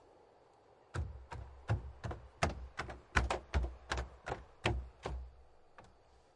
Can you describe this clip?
Running on wooden platform near the seaside version 1
Sound of a person running on a wooden platform. Ambient sounds which also can be heard are the ocean and crickets in the background.
Recorded on the Zoom F4 and Rode M5's
footsteps, walking